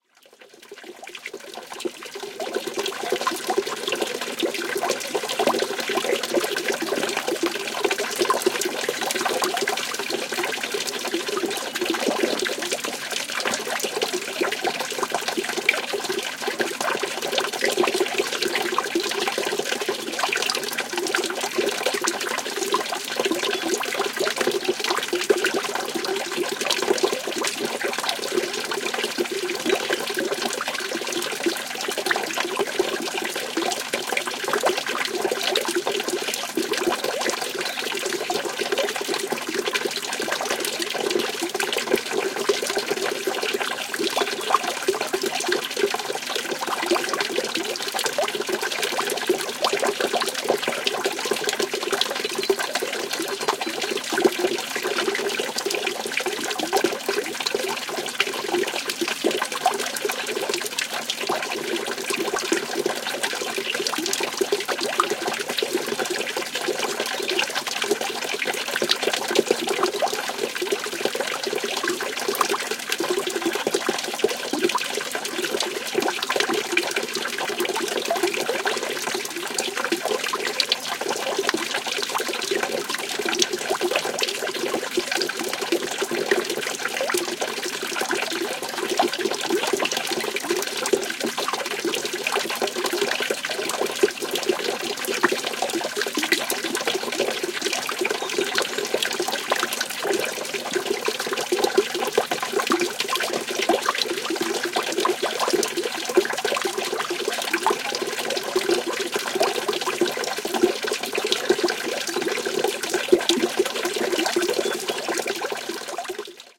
Water falling on basin, some bird tweets in background. Primo EM172 capsules inside widscreens, FEL Microphone Amplifier BMA2, PCM-M10 recorder. Recorded at Fuente de la Pileta, near Bienservida (Albacete, Spain)
washbowl, water, field-recording, splash, liquid, stream, pond, basin, spurt